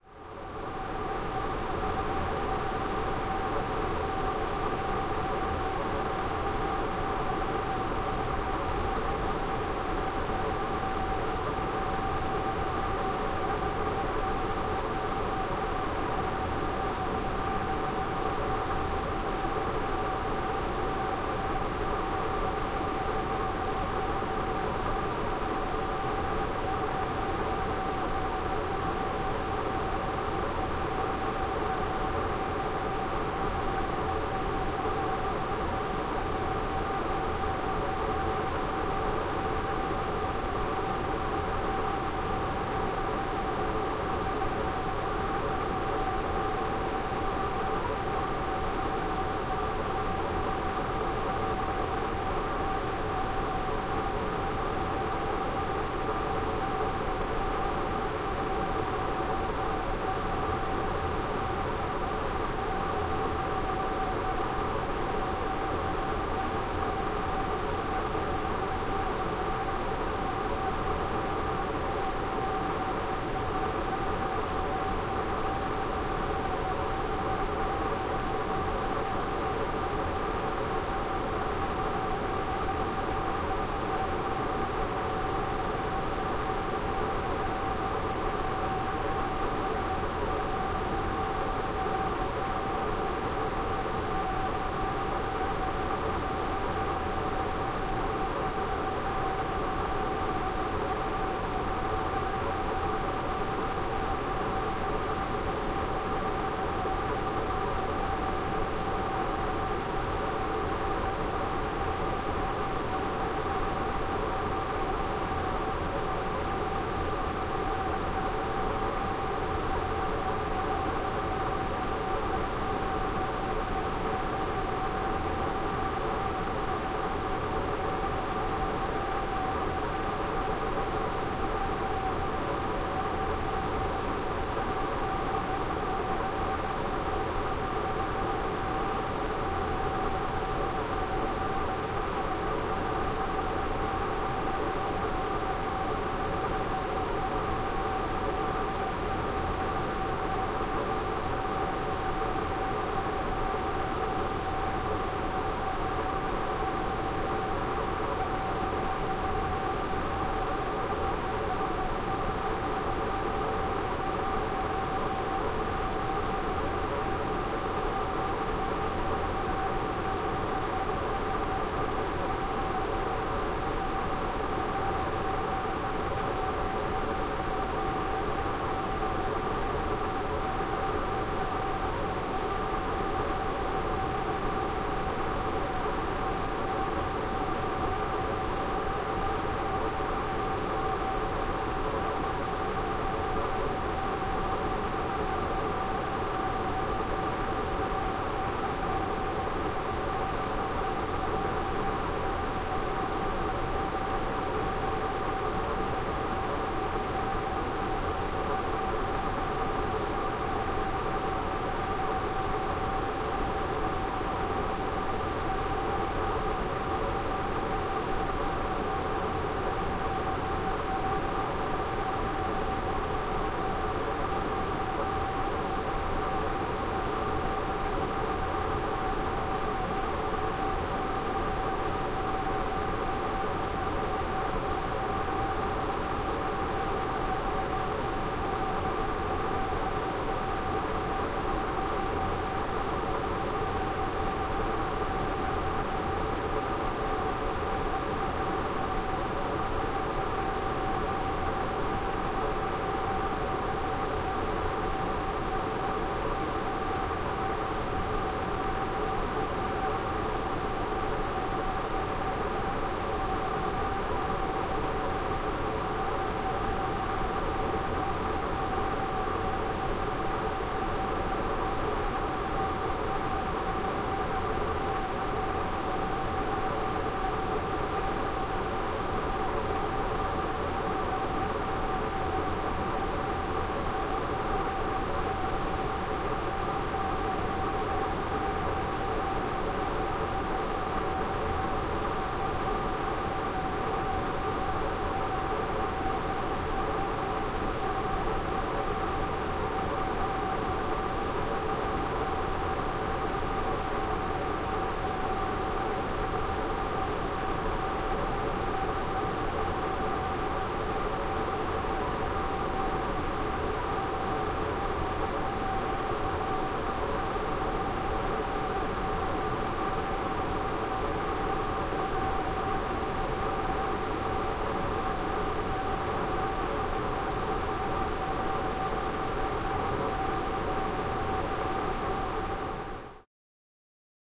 Air Conditioner A/C Vent Hum - Room Tone
Room tone including a close perspective A/C vent.
Recorded in 44.1/16 on an Earthworks SR-69 pair in XY, into a Zoom H4n. Trimmed, no EQ
AC, ambiance, ambient, atmos, atmosphere, background, background-sound, field-recording, general-noise, office, room-tone, white-noise